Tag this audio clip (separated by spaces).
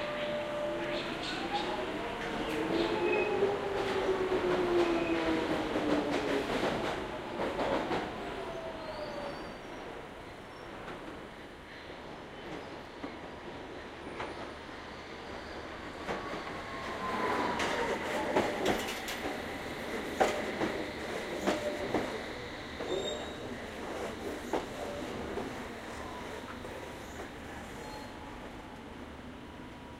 ambience binaural c4dm field-recording london qmul tubestation